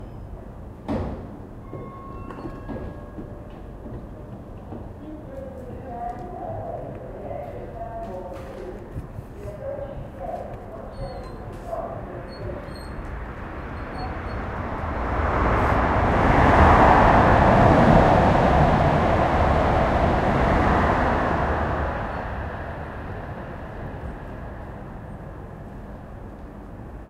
Indistinct announcement non-stopping train on platform 2
Indistinct announcement about a non-stopping train on platform 2. Followed by the sound of the passing train. Reccorded from a couple of platforms away.
Recording made at Slough train station, 23 Sep 2011, with a Zoom H1 using the built in mics.
announcement, indistinct, loudspeakers, non-stop-train, passing-train, Train, train-station